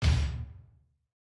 Industrial kick made by combining four heavily processed samples of household items